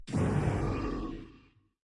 Shadow Evil Spell Dark Magic
dark, evil, magic, magical, magician, rpg, shadow, skill, spell, witch, wizard